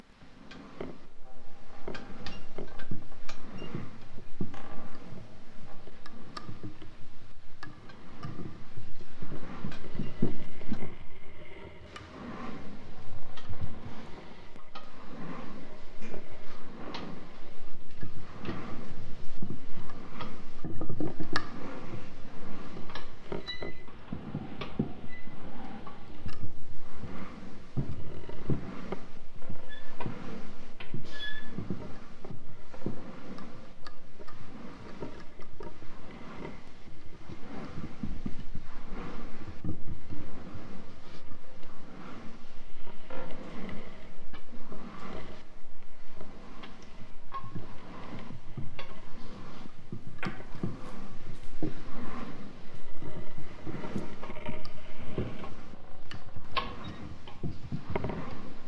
Came up with my own version of a windmill, following instructions on another site:
Though instead of pitch-shifting some of the sounds as instructed, I time-stretched them (also affecting the pitch) to give them a bigger feel.